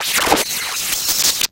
an angry synthesized dog and cat going at it.
TwEak the Mods
electro, micron, base, acid, idm